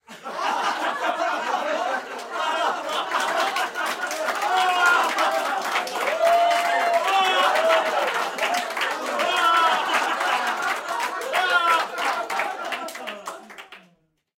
Recorded inside with about 15 people.
applaud, crowd, audience, cheer, adults, clapping, cheering, hand-clapping, inside, people, applause, group, theatre